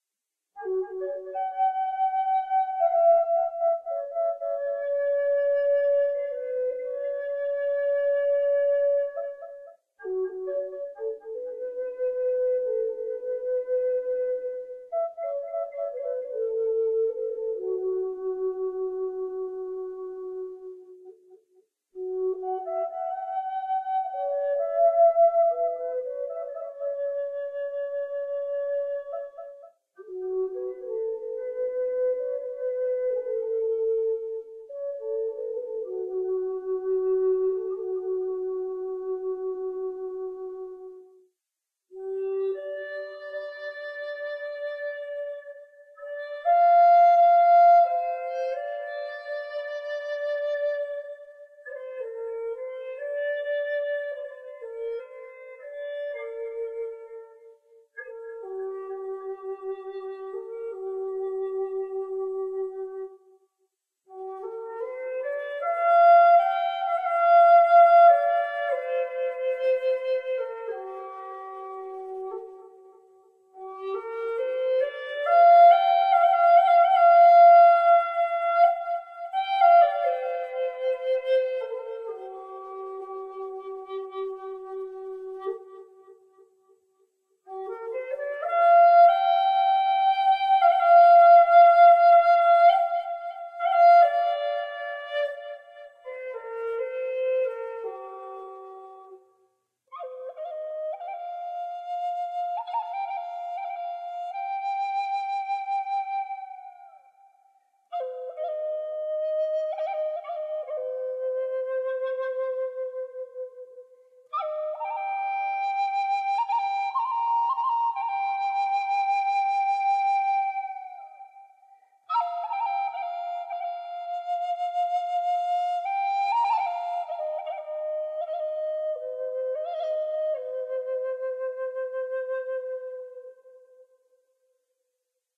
Includes tracks (in order):
ambient, american, cedar, flute, instrumental, meditation, melody, native, nature, peace, sad, soothing, wind